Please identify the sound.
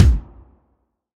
Several real kick hits layered and processed.